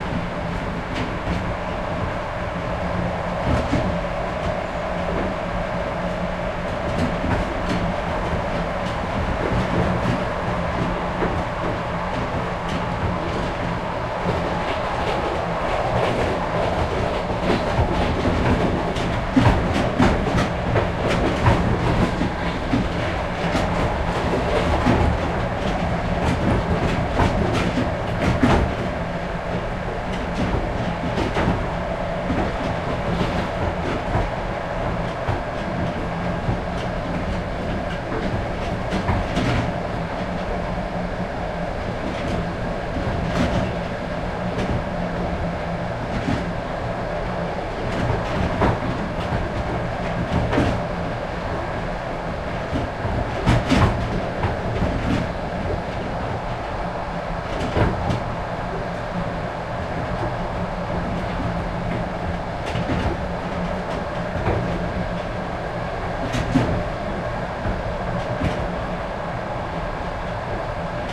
between passenger wagons 20130329 3
Sound between passenger wagons. Outdoor.
Recorded: 29-03-2013.
travel, railway